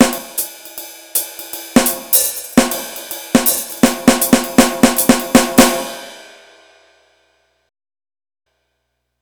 jazz beat using an SPD-20